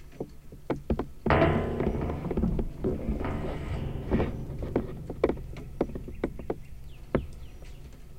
Contact mic on a door with a spring